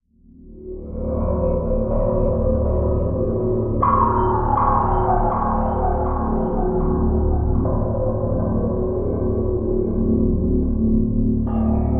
Layered pads for your sampler.Ambient, lounge, downbeat, electronica, chillout.Tempo aprox :90 bpm